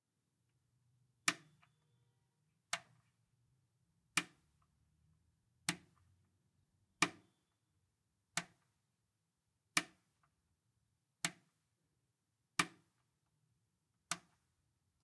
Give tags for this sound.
ambient; button; off; switch; click; hi-tech; press; short

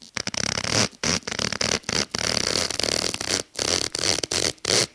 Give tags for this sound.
creepy; shoe

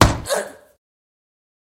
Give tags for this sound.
Female; Impact; Voice

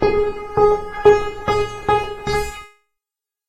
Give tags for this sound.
concrete; feet; foot; footstep; footsteps; running; step; steps; walk; walking

